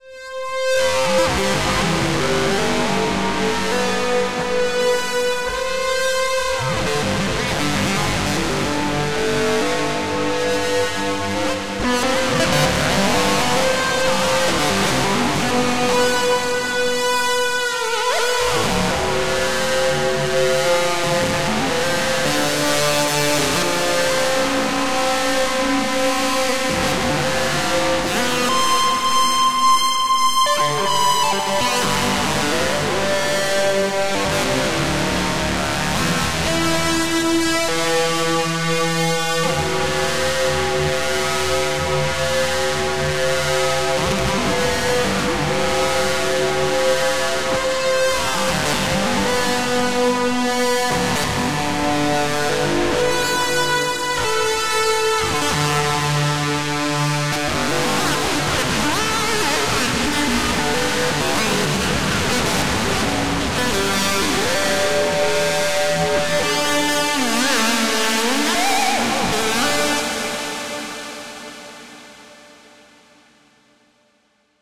Abstract electric guitar solo with melodic elements, recorded live through rat box, lots of harmonic feedback etc.